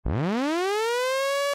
SCIAlrm8 bit sweep mid hi
8-bit similar sounds generated on Pro Tools from a sawtooth wave signal modulated with some plug-ins
alert, spaceship, synth, robot, beep, alarm, scifi, 8bit, computer